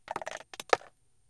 One day in the Grand Canyon I found a deep crack in a cliff so I put my binaural mics down in it then dropped some small rocks into the crack. Each one is somewhat different based on the size of the rock and how far down it went.
crack, rock